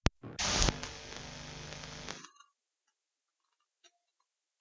Sound of a video starting to play in a VHS player. Good static sounds.
Recorded out of the VHS player into my laptop using an RCA cable.